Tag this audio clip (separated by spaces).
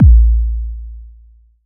kick drum bd